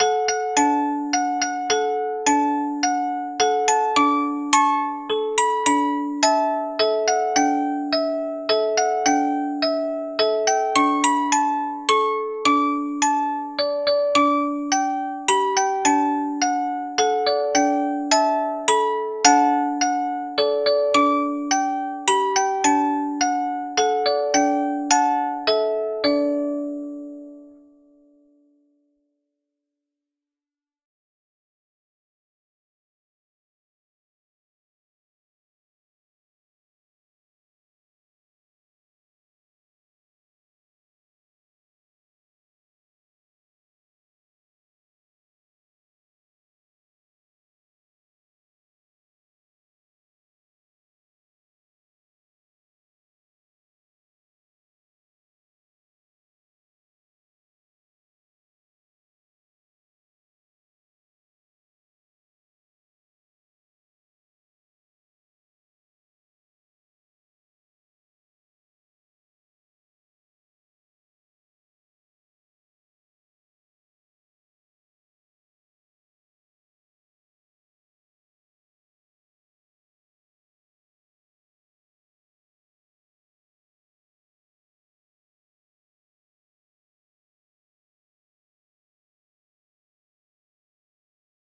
Music Box Playing Berceuse - J Brahms
antique, box, classical, hand-operated, historical, instrumental, jingle, mechanical, mechanical-instrument, mechanism, melancholic, melancholy, metallic, music, musical, musical-box, music-box, musicbox, old, sound-museum, wind-up